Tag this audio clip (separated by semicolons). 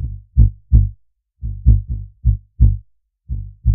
bass; moog; synth